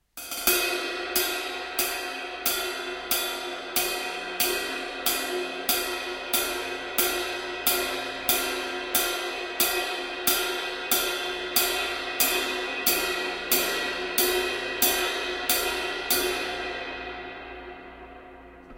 Zildjian K Custom Special Dry Ride 21" played with sticks. No drum beats.